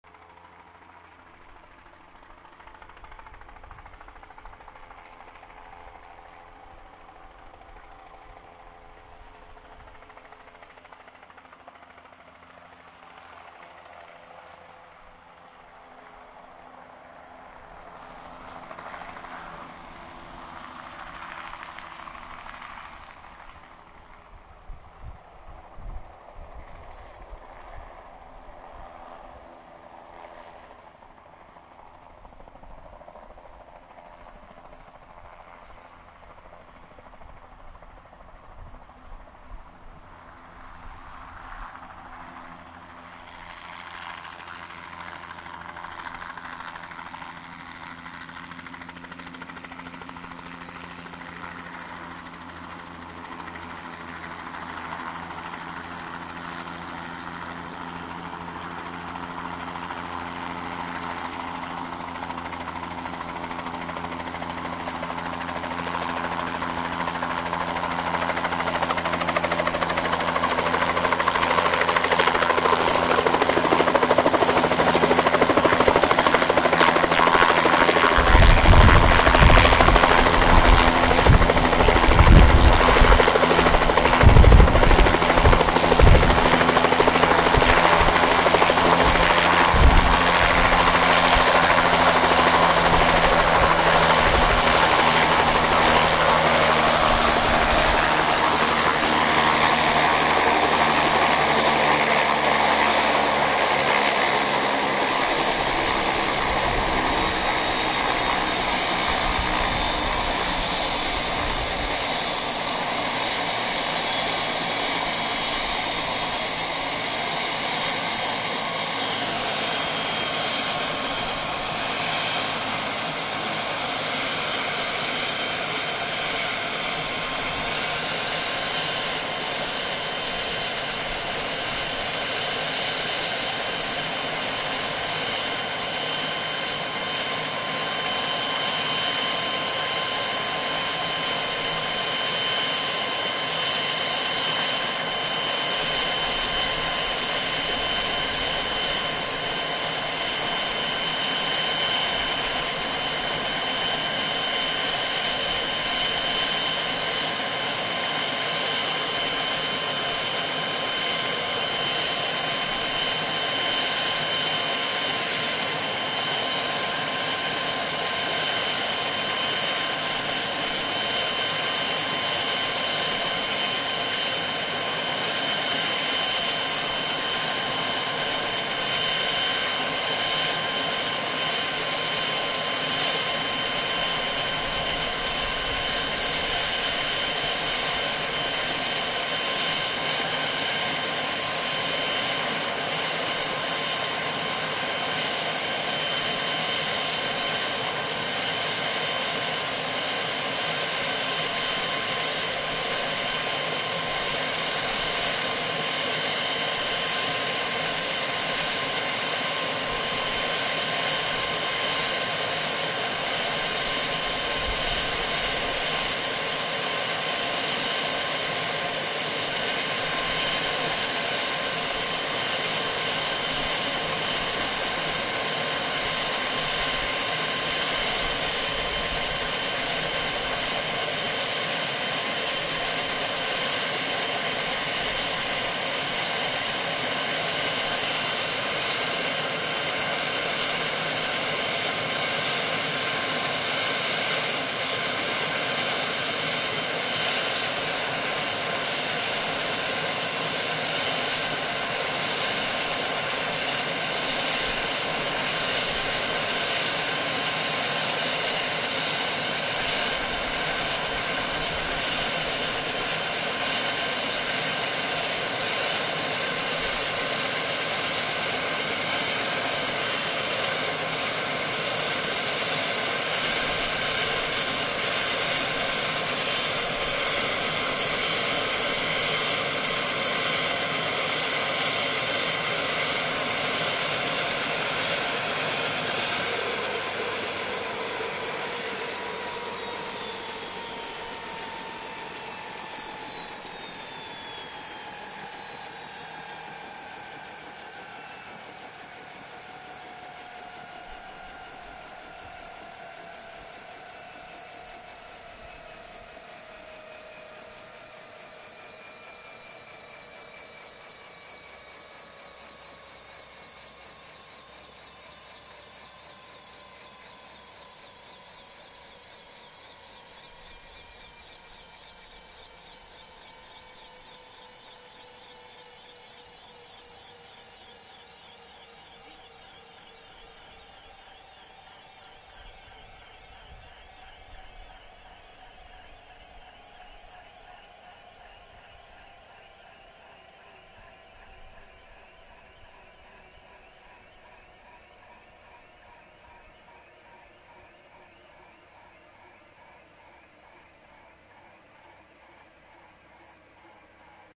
Bell Jet Ranger Landing at Bankstown Airport